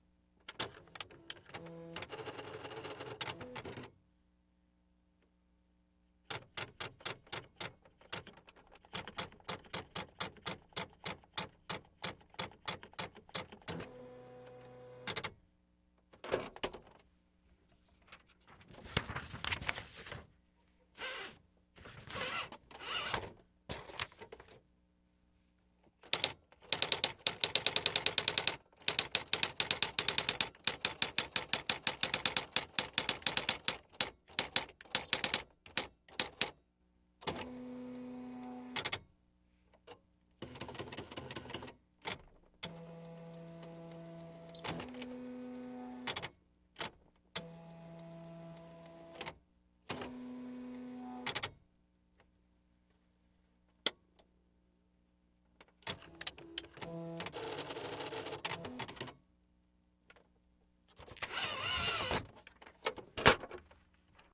By request - a daisywheel typewriter in various states of being. AKG condenser microphone M-Audio Delta AP
click, daisywheel, mechanical, motor, typewriter, typing